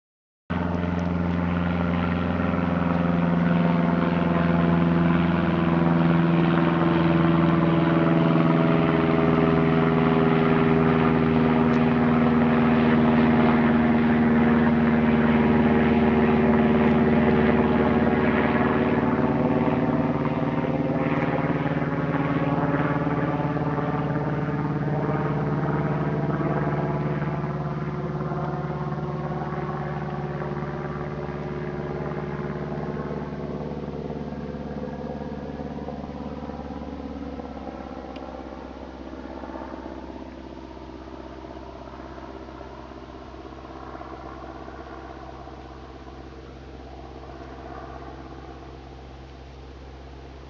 Unedited field recordings of a light aircraft flying overhead in clear and calm conditions, evocative of summer days. Recorded using the video function of my Panasonic Lumix camera and extracted with AoA Audio Extractor.